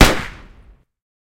Gun Sound 4
action, effect, gun, horror, sound, sound-effect